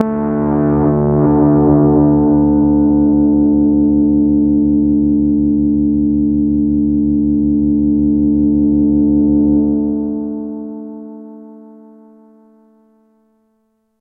Super FM Pad D2
An evolving pad type sound created on a Nord Modular synth using FM synthesis and strange envelope shapes. Each file ends in the note name so that it is easy to load into your favorite sampler.
multi-sample, drone, nord, evolving, note, digital, fm, multisample, pad, sound-design